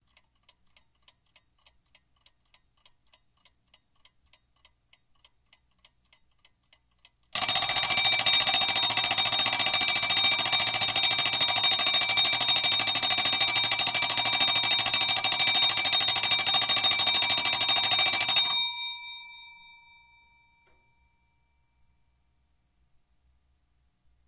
clock ticking + alarm bell
Smiths Interval Clock Timer ticking and 10 second alarm bell.
alarm, bell, clock, Interval, Smiths, tic, tick, ticking, ticks, time, timer, tock, tok, wind, winding